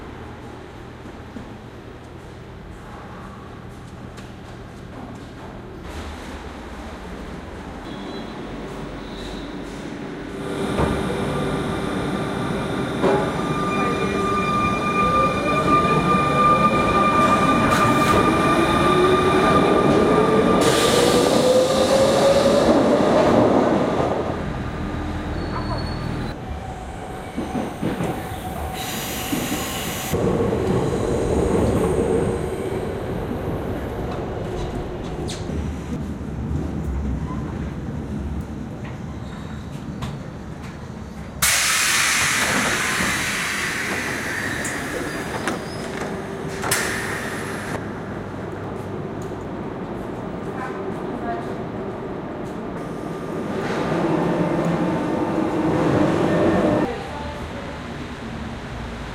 Paris Commuter Train, outside and inside 2
City of Paris, Commuter Train, from outside and inside (no. 2)